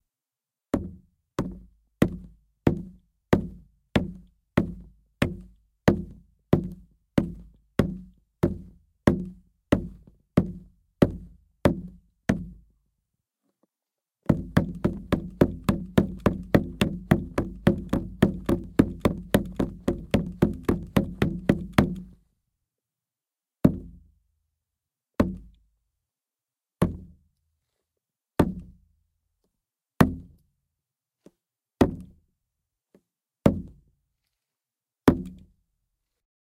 boat footsteps running hard Current
Meant to be footsteps on a boat, it's actually just pounding boots on a five-gallon plastic jug of water. This is a harder version with more clicky transients.
steps, gallon, shoe, foley, 5gallon, footsteps, walking, jug, fiberglass, footstep, step, handling, plastic, waterbottle, deep, walk, waterjug, boat